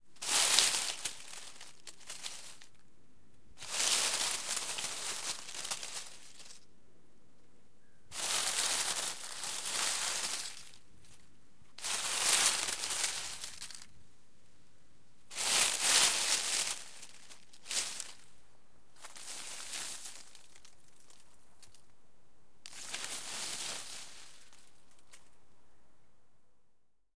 Movement of dry leaves bush
bush, foliage, leaf, leaves, rustle, rustling, texture, tree